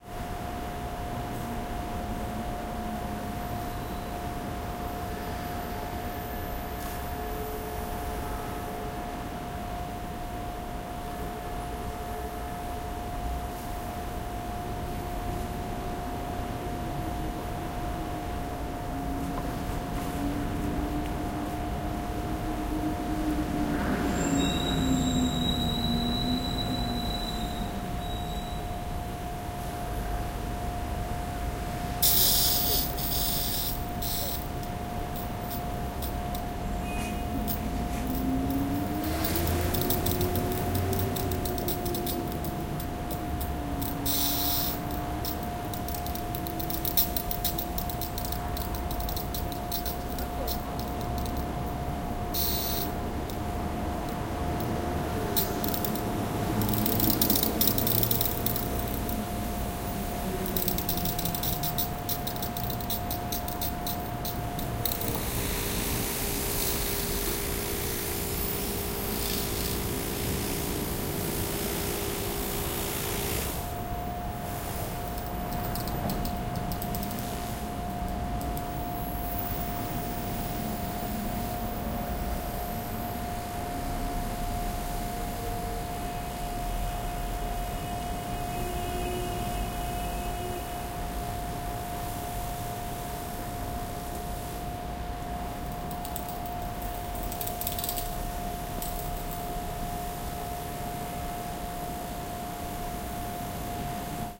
Street cleaner with high pressure water. Traffic in the background. Bus. Horn.
20120212